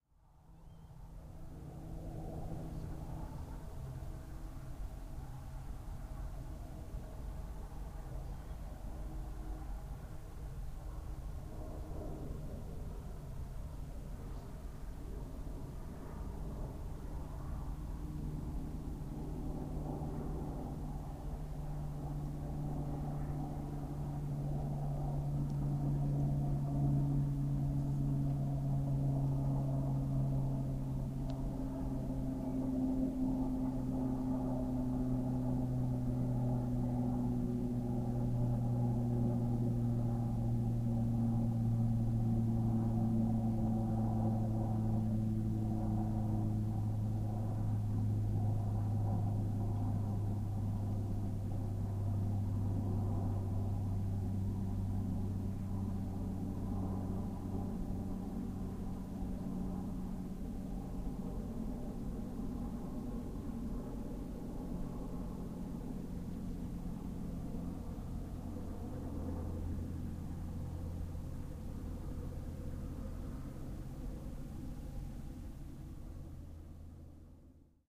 aeroplane passing by
The sound of a small private aeroplane flying low, at night. It's quietly passing by and you can hear the Doppler effect quite clear.
Recorded with a TSM PR1 portable digital recorder, with external stereo microphones. Edited in Audacity.
private, aeroplane, plane, passing, flying